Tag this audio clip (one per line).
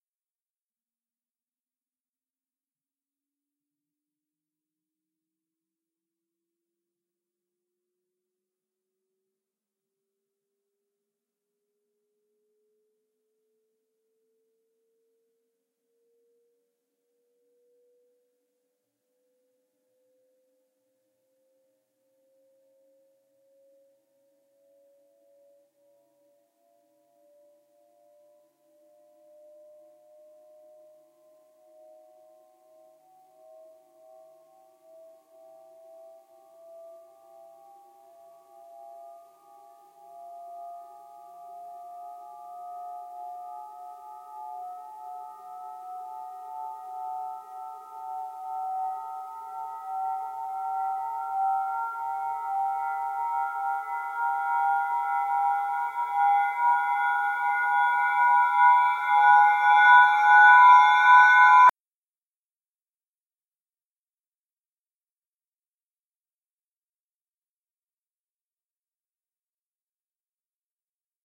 appear
approach
backwards
build
build-up
cinematic
crescendo
fear
glass
intro
reverse
rise
rising
tension